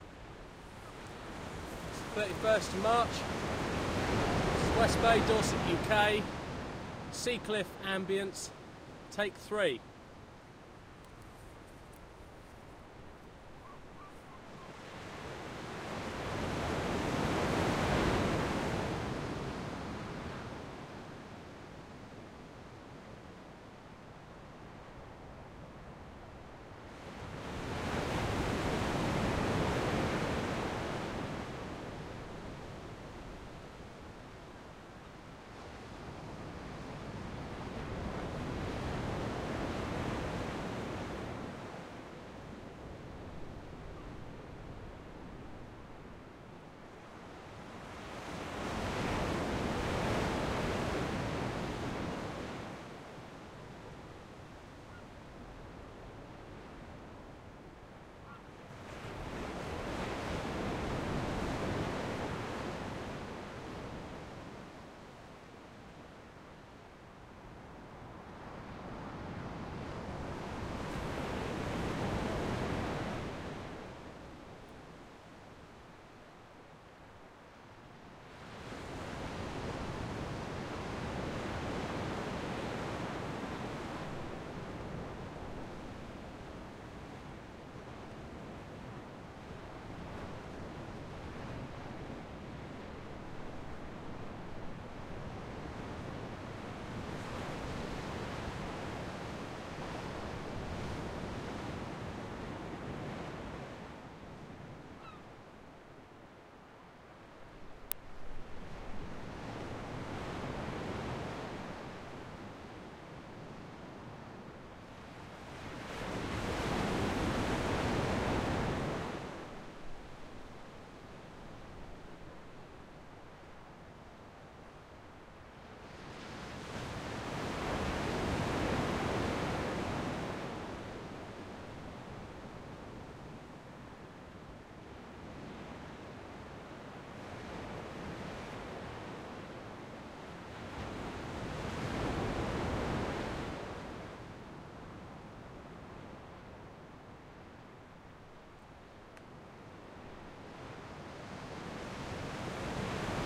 CFX-20130331-UK-DorsetSeaCliff03
Sea Cliff Ambience
Sea, Ambience, Cliff